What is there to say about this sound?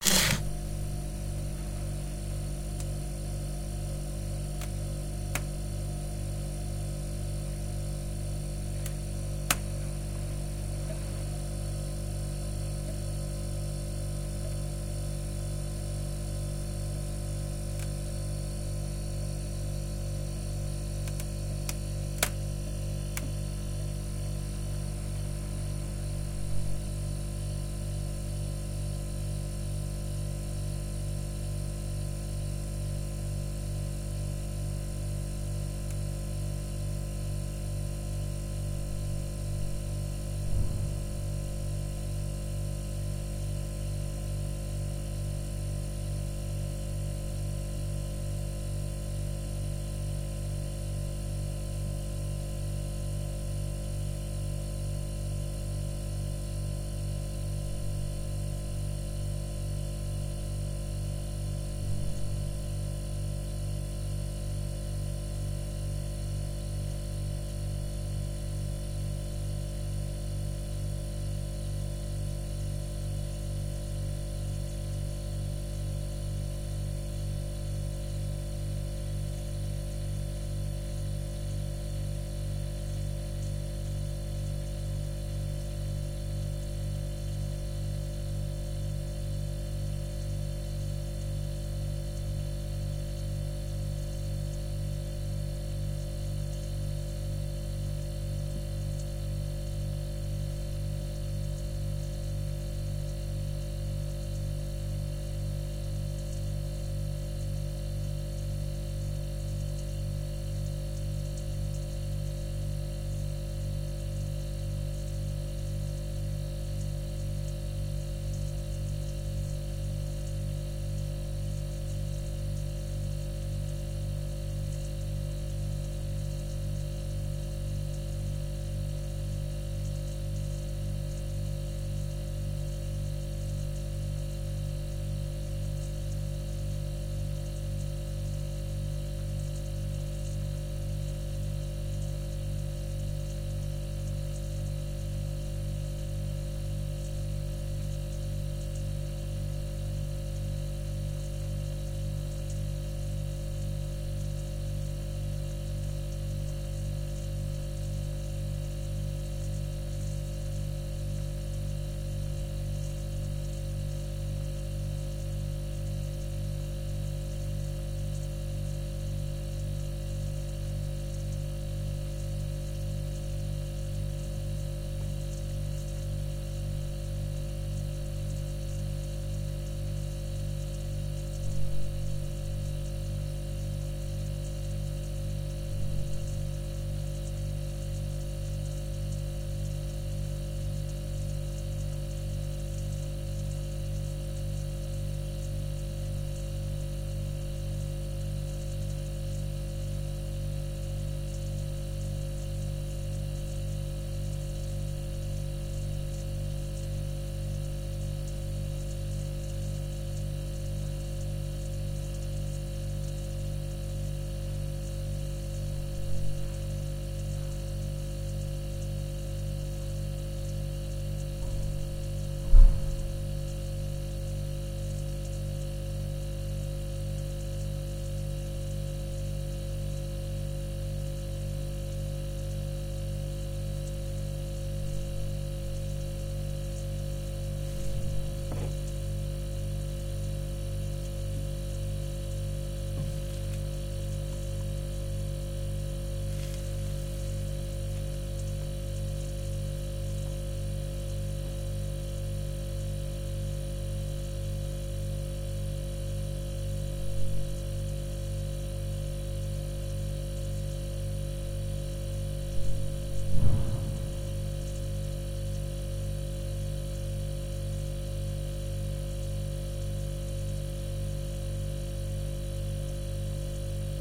Stereo recording of my refrigerator inside my apartment.
kallio, listen-to-helsinki, torkkelinkuja, locativesoundws09